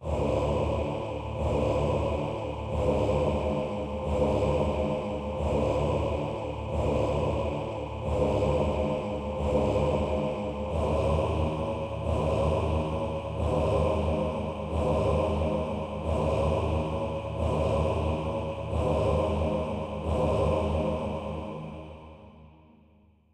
These sounds are made with vst instruments by Hörspiel-Werkstatt Bad Hersfeld